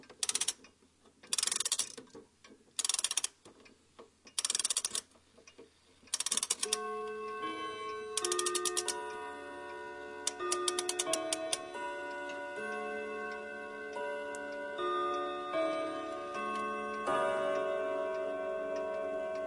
winding a wall clock with a key, then bells chime the melody and strike once. Olympus LS10 recorder internal mics
tictac, time, field-recording, winding, ticktock, bell, spring, wall-clock